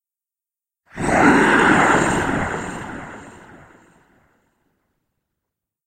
Demonic Anger
This sound can for example be used in role-playing games, for example when the player plays as Necromancer and casts a spell upon an enemy - you name it!
If you enjoyed the sound, please STAR, COMMENT, SPREAD THE WORD!🗣 It really helps!